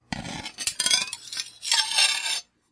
Slow, gritty scraping of a knife on a sharpener.